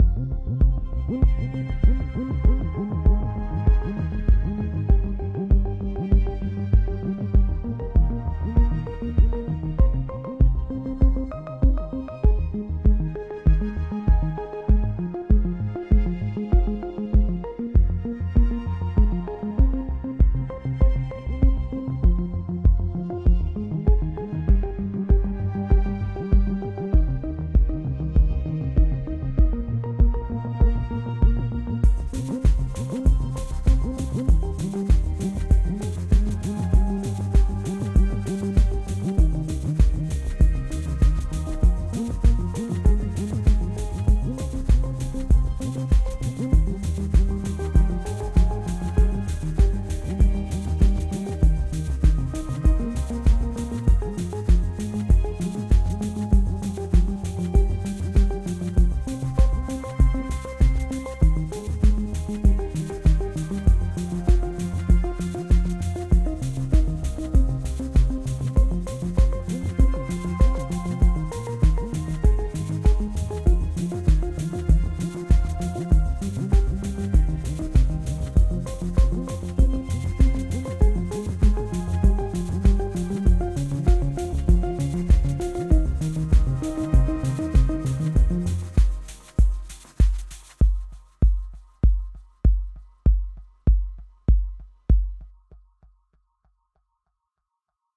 Arturia Minibrute + Korg M3 + Drums
Key of Fm
98BPM